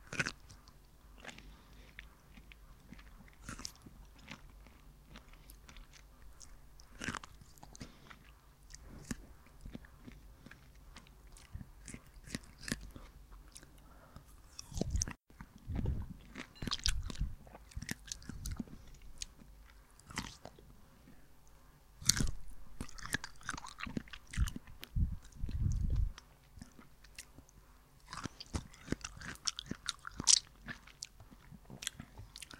Wet, fibrous sound of eating pineapple rings with an open mouth. Some washing machine noise in background.
~ Popeye's really strong hands.
chewing, crunching, eating, fruit